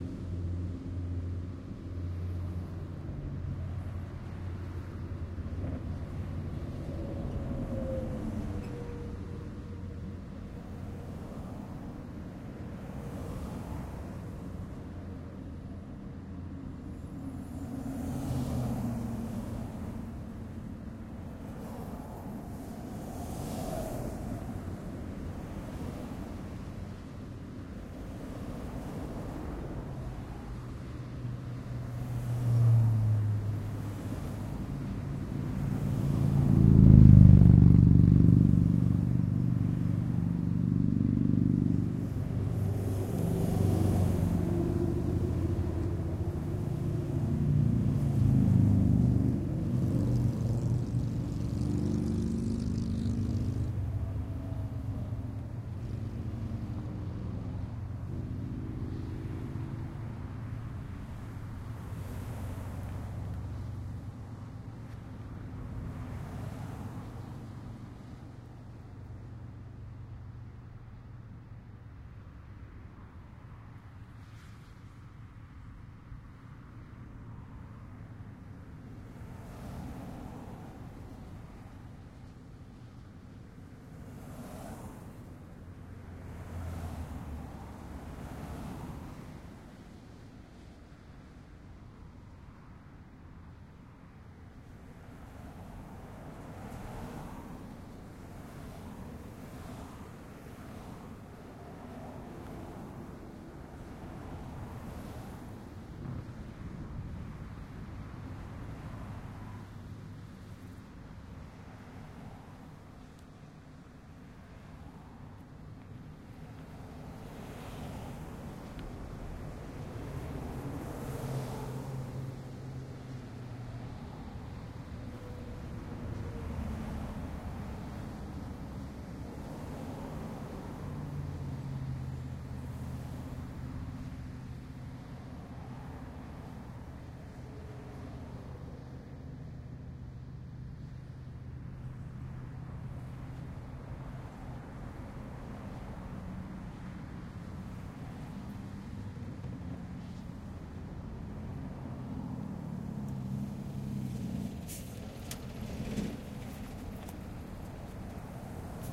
Field and Traffic
I think this was in Huntington Beach. A nice quiet field with light street traffic in the background.